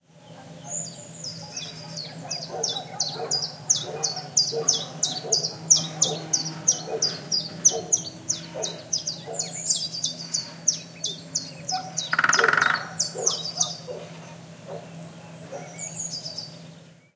After some bird tweets and distant dog barkings, a woodpecker hammers. EM172 Matched Stereo Pair (Clippy XLR, by FEL Communications Ltd) into Sound Devices Mixpre-3 with autolimiters off. Recorded near Aceña de la Borrega, Extremadura (Spain)